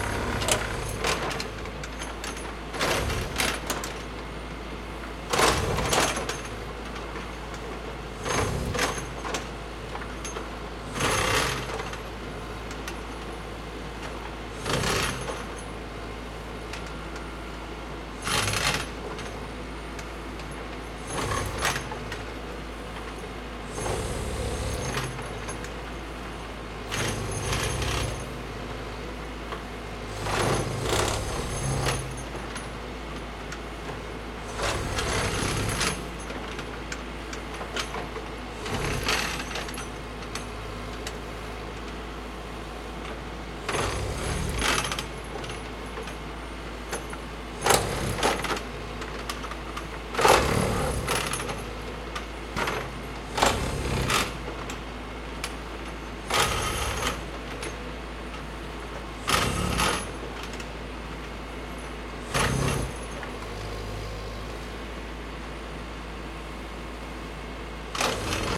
On April 4, 2017, the water main in front of my partner's apartment and my studio broke. While I was working on mixing a song in my studio I heard, almost FELT, a massive sound coming from outside. Lots of bass. It was such a cool sound that I just HAD to capture it. It was a "tamper," a tractor that was tamping the ground back down after the line had been repaired and the hole filled in.
I got two recordings of it; this is the second one. This recording has less bass and more of the mechanical sounds of the tamper itself.
Recorded on iPhone 6 Plus 128 GB